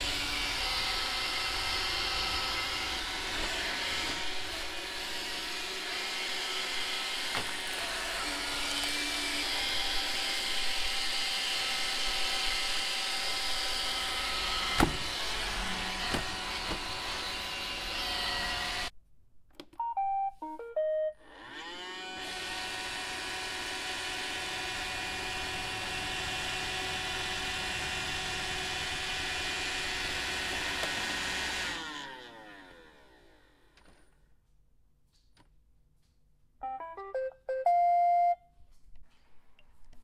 Sound of Roomba robot vacuum cleaner cleaning and entering the battery station
This is the sound of my Roomba 671 robot vacuum cleaner, you hear him cleaning and the brushes are turning. You also hear some bumps against the wall before he enters the battery station and makes the typical sound when the Roomba entered the battery station succesfully. Recorded with a Tascam DR 40.
robotstofzuiger, robot-vacuum-cleaner, roomba, roomba-671, vacuum-cleaner